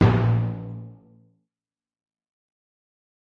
A clean HQ Timpani with nothing special. Not tuned. Have fun!!
No. 3
acoustic, drum, drums, dry, hit, HQ, one-shot, orchestra, orchestral, pauke, percs, percussion, percussive, stereo, timp, timpani